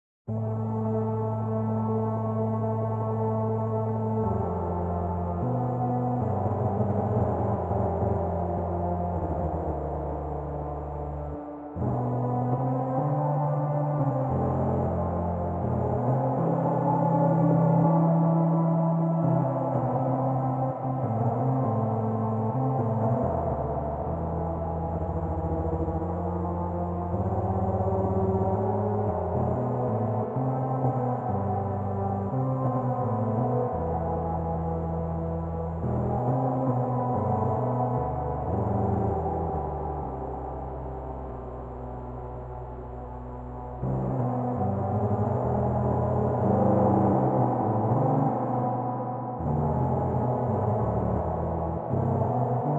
Short Jam on Weird Microtonal Organ-Flute-Synth
ambient, atmosphere, avantgarde, drone, experimental, flute, microtonal, organ, synth, synthesizer, weird, xenharmonic